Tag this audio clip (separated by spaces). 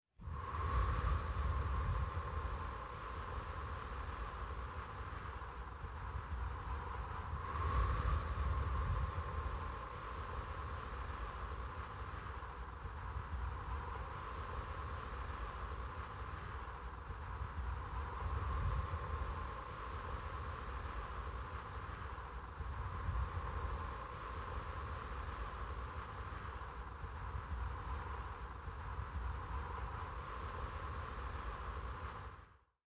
ambient wind